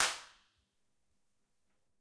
Recorded on a Tascam MSR16 4 Claps two per Track. There might be some low freq noise, since other instruments bleeded from other tracks of the machine, but thats why they are called "dirty drum samples" :) Can be layerd to get a Gang-Clap.